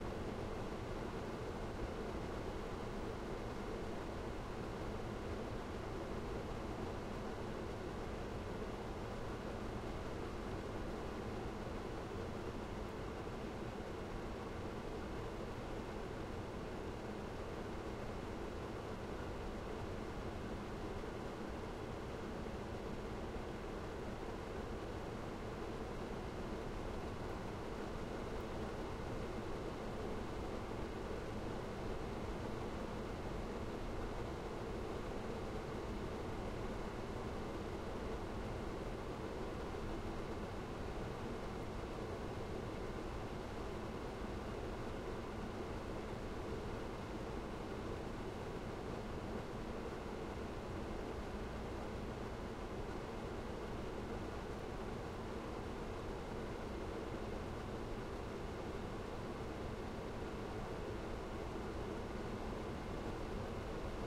Air conditioner
Air conditioning from 6 inches
AC, hotel